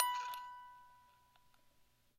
MUSIC BOX B 1
6th In chromatic order.
music-box, chimes